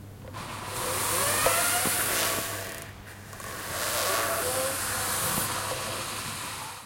door hinge
Recording of the hinge of a door opening and closing at an underground corridor at UPF Communication Campus in Barcelona.